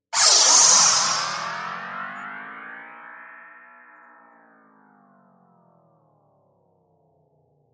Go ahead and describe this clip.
HITS & DRONES 27
Fx, Sound, broadcasting